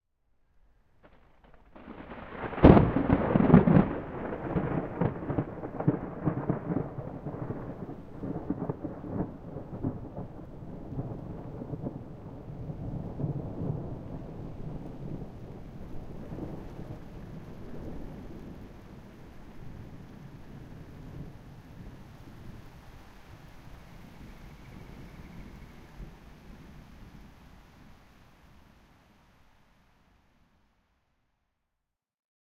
Thunder and Beginning of Rainfall
Recording of a large crack of thunder, with rain simultaneously beginning to fall. Rain can be heard on a roof; I was in a grassy area with a building to my right. I edited out the sounds of drops hitting the recorder and some crickets in the background. I also used a compressor.
Recorded with a H4n Pro on the 03/02/2020
Edited in Audacity
storm, lightning, peal, thunderclap, rain, thunder, clap, crackle, crack, rumble